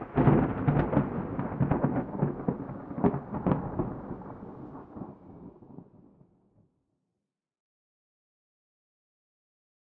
Field-recording Thunder London England.
21st floor of balfron tower easter 2011